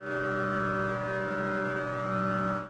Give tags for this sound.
fx; sound; synthesiser

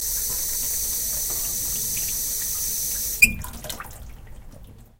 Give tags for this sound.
water; sink; tap; bathroom; kitchen; bath

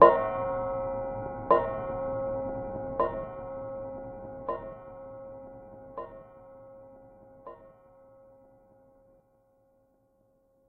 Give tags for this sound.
boom; bell; church; bells; gong

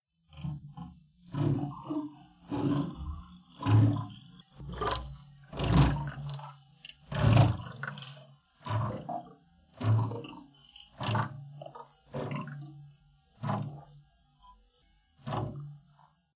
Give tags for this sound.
ambient; hose; movie-sound; sound-effect; water

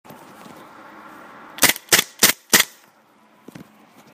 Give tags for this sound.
pistol; silencer

